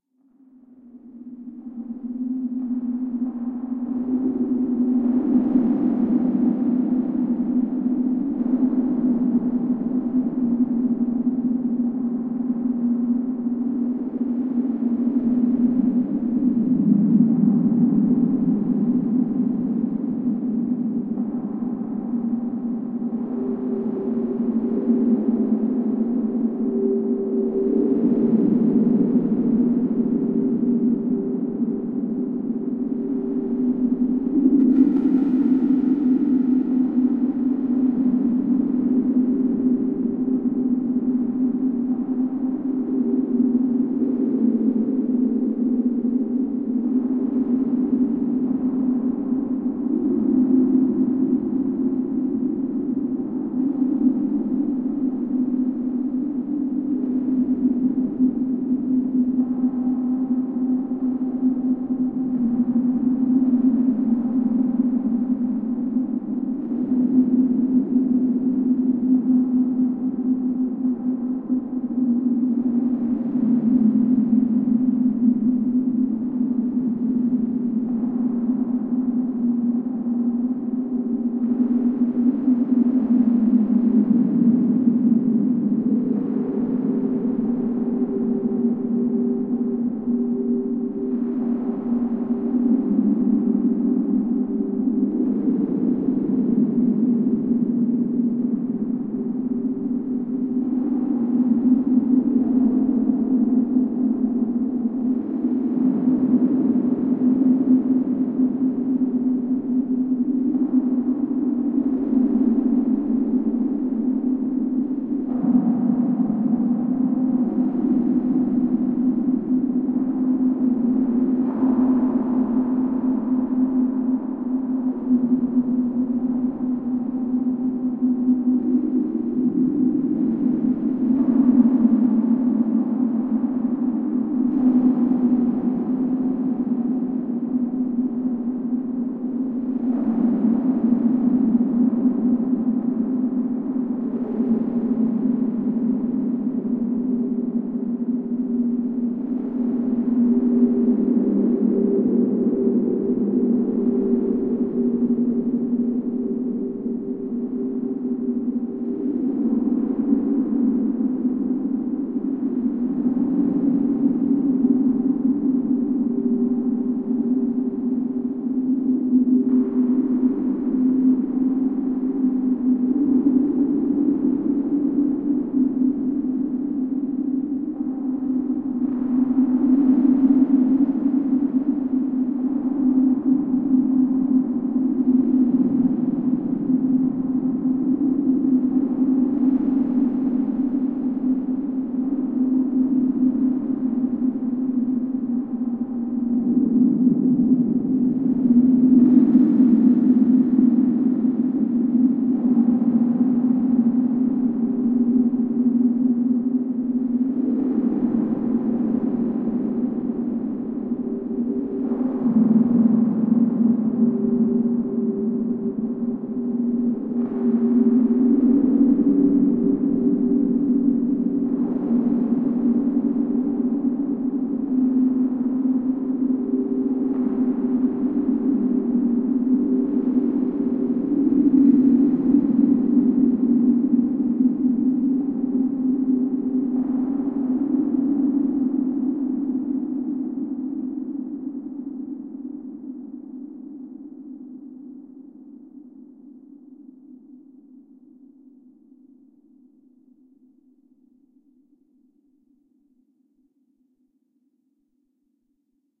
EZERBEE DEEP SPACE DRONE AAAA
This sample is part of the "EZERBEE DEEP SPACE DRONE A" sample pack. 4 minutes of deep space ambiance. The sound was send through the Classic Verb from my TC Powercore Firewire.
effect, electronic, soundscape, drone, space, reaktor